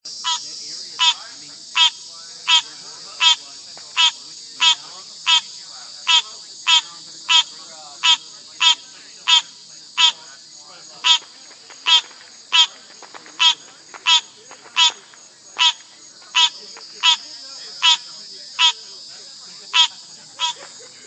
FL frogs 7.4.2013
Frog announcement calls in a backyard in Palm Bay, FL. Recorded with iPhone 4S internal mic.